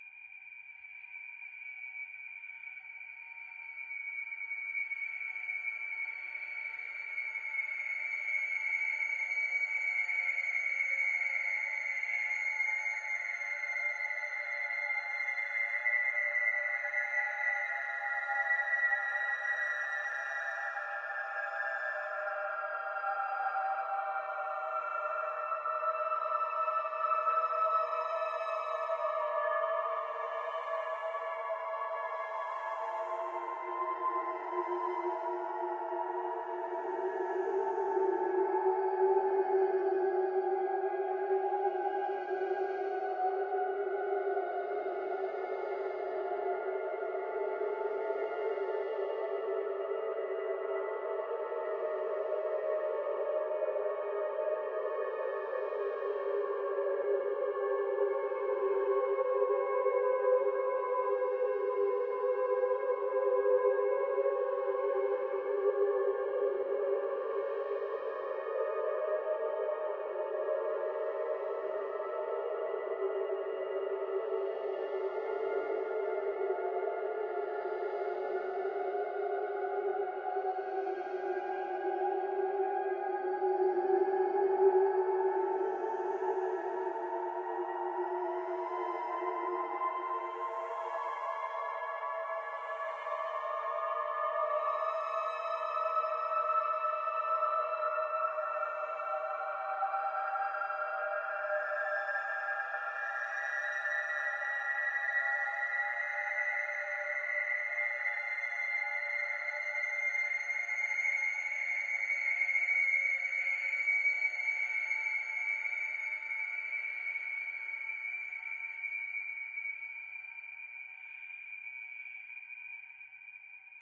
flutes sample 1
flute scale from each side
scales, drone, ascending, background, flute, descending, atmosphere, ambient